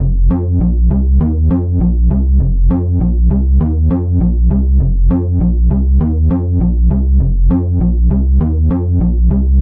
gl-electro-bass-loop-008
This loop is created using Image-Line Morphine synth plugin